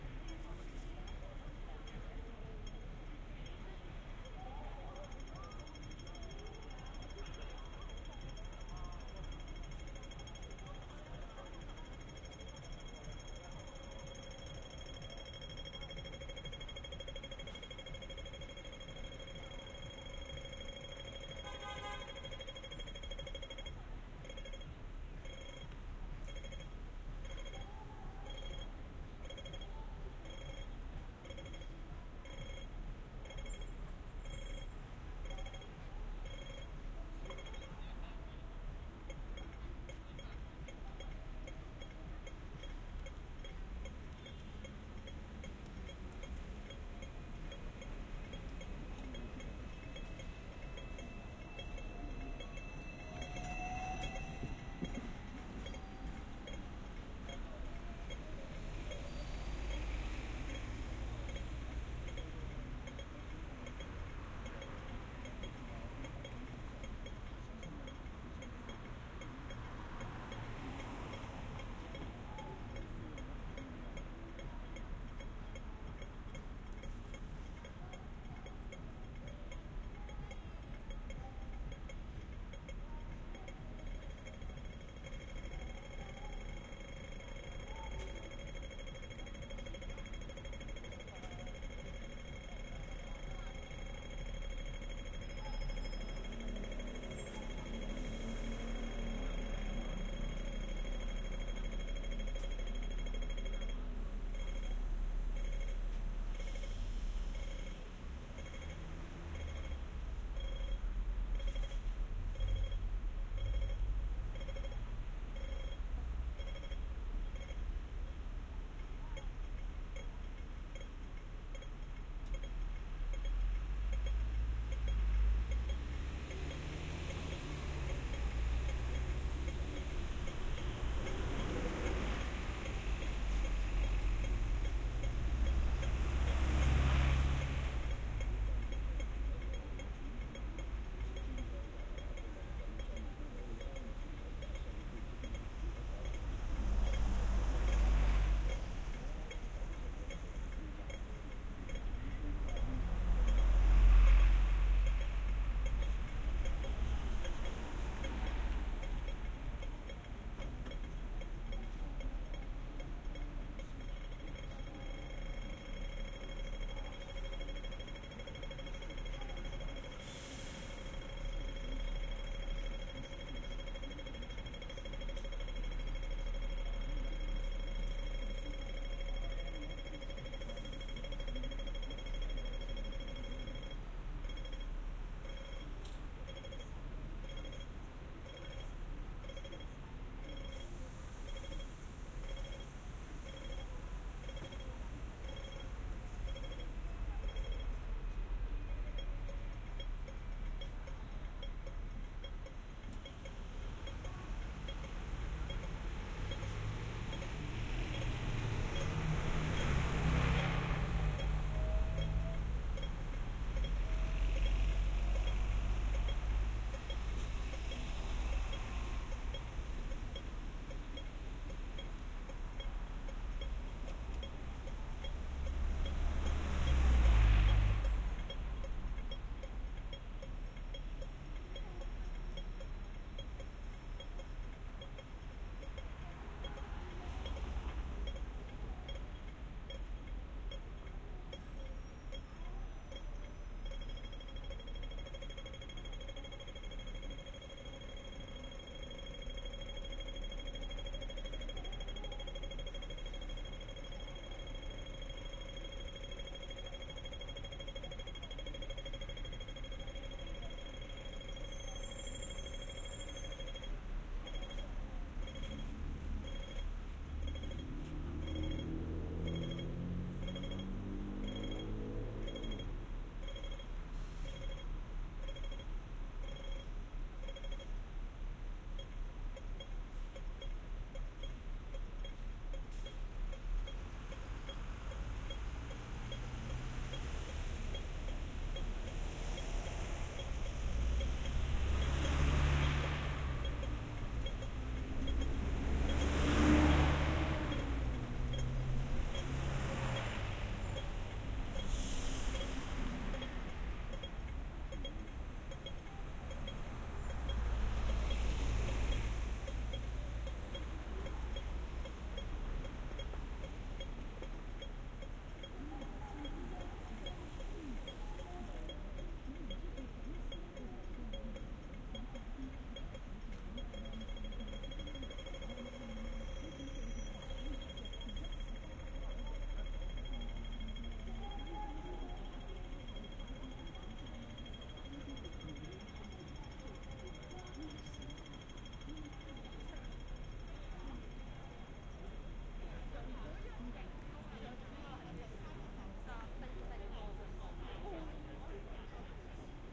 Causeway Bay traffic 2022-05-03 21.17.14 T011 In1
Standing between Sogo and 美珍香 in Causeway Bay, Hong Kong. Recorded with Sennheiser AMBEO Smart Headset.
Hong-Kong, Binaural, field-recording